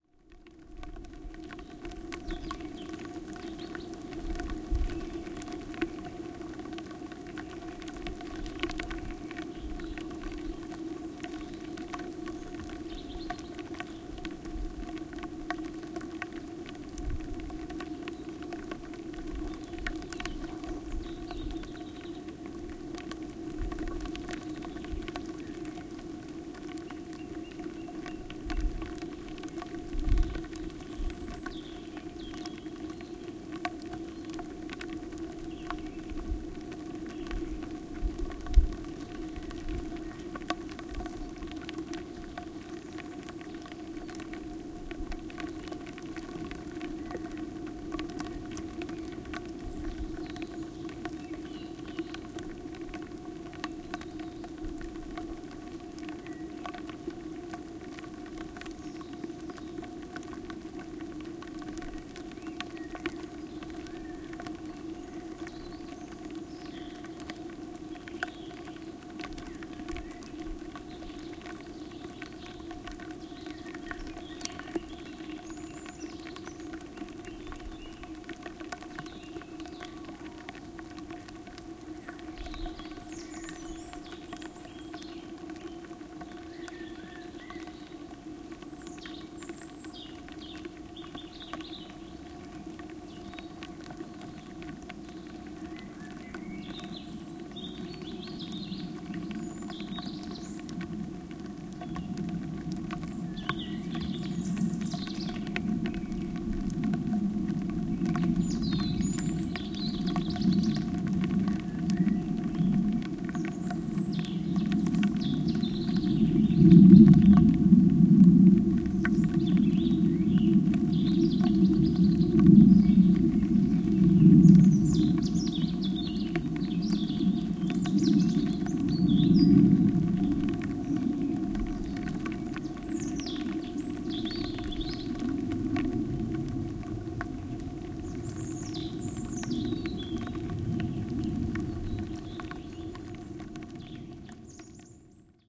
SALA - wind on pipes
recorded by SALA in Lithuania. here is his description: "rubbish left by people in nature...this time it were some kind of pipes from automobile. microphones placed inside and raining outside"